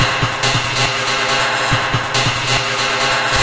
Industrial break
break, groovy, 140-bpm, quantized, rubbish, reverb, beat, improvised, percussion, garbage, percussion-loop, industrial, percs, drum-loop, water